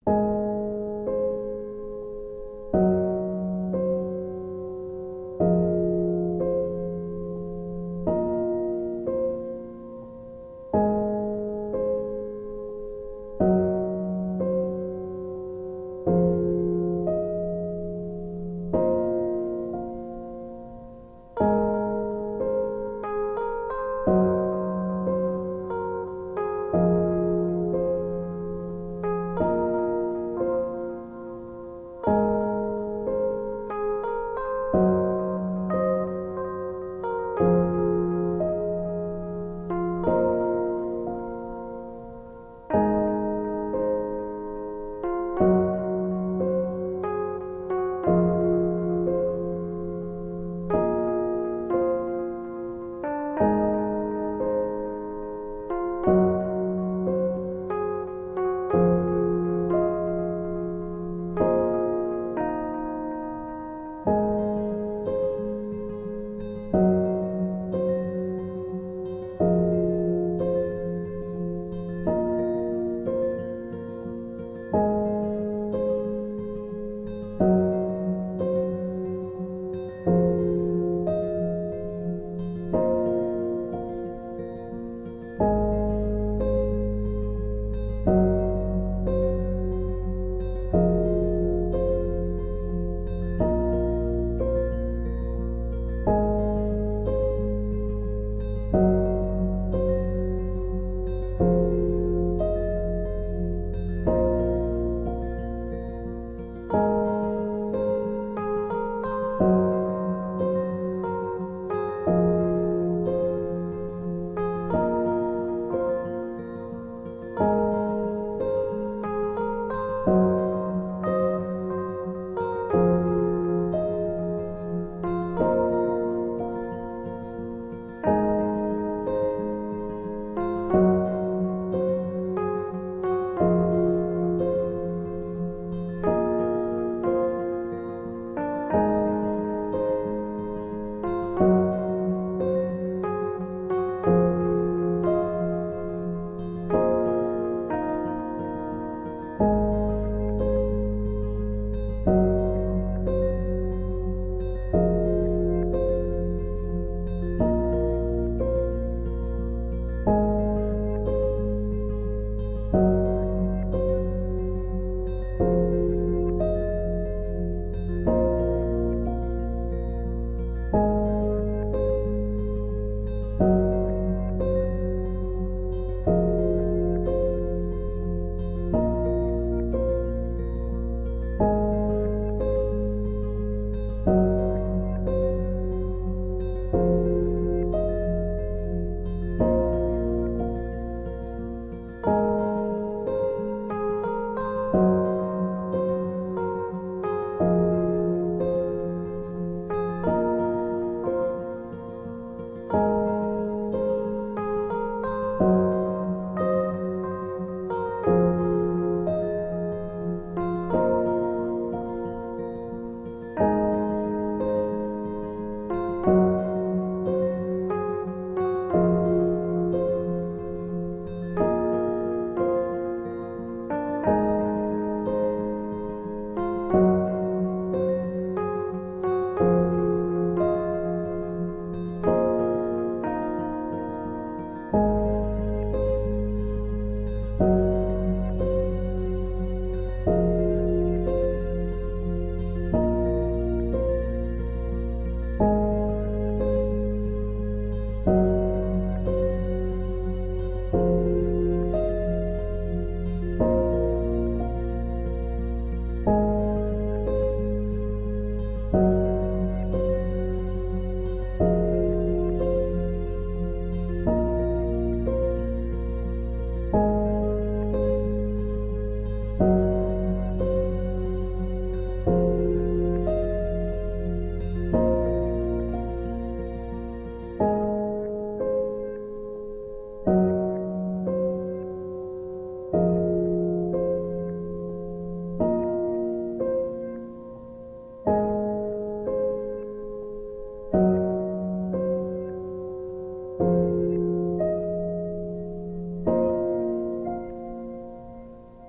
Relaxing Piano Guitar
Title: Night Town
Genre: Relaxing, Ambient
Regarding about this one, I was volunteering as music a composer on a game and got rejected lol.
Acoustic, Ambient, Background-music, Bass, Guitar, Music, Piano, Relaxing